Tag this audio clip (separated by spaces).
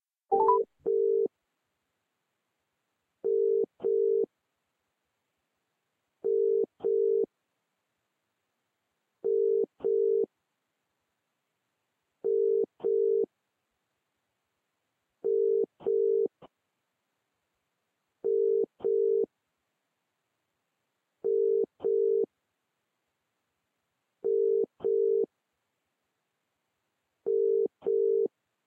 call caller calling cell mobile outgoing phone telephone